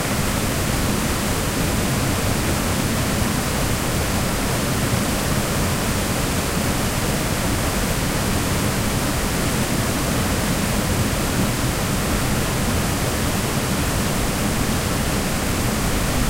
LS 33471 PH WaterFalls
Big water-falls.
Audio file recorded in November 2016, in Tablas island (Romblon, Philippines).
Recorder : Olympus LS-3 (internal microphones, TRESMIC ON).